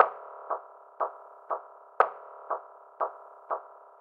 A loop created from the snares of my mic-noise drum samples. Cut, and looped in CoolEdit.